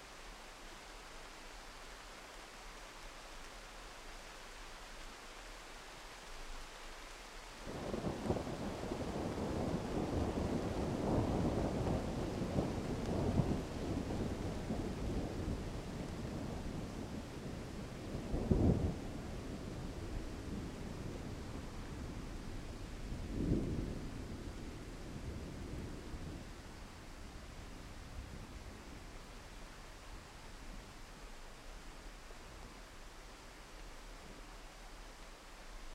rain thunders 07 2013
rain and thunders in the distance, in a forest near Cividale (Udine, Italy), summer 2013, recorded with Zoom H4n, Sennheiser shotgun mic and Rode blimp
ambience, field-recording, rain, summer, thunders